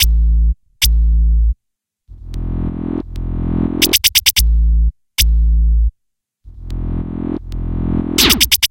8bit110bpm-32
The 8 Bit Gamer collection is a fun chip tune like collection of comptuer generated sound organized into loops